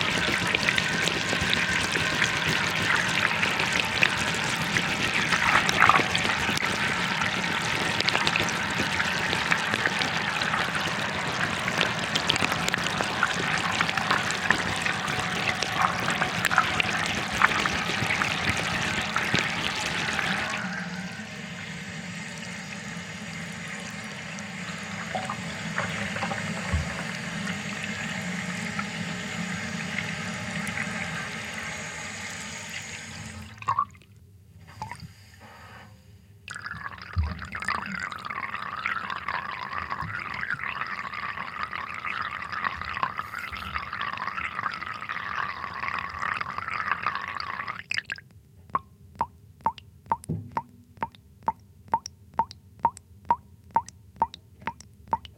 Under the tap
Sound of running/filling/dripping tap as recorded about 15 cm underwater below the surface of the water. Hydrophones were resting in the bottom of a metal sink.
drip, drop, foley, hydrophone, water, wet